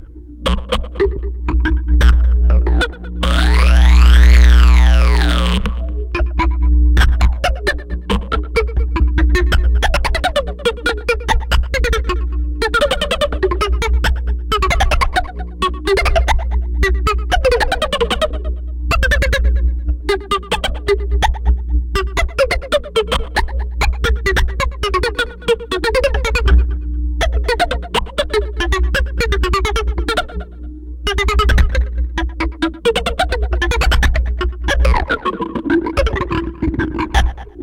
Recorded with a guitar cable, a zoom bass processor and various surfaces and magnetic fields in my apartment. 1/4 cable plug dancing on a mic stand boom..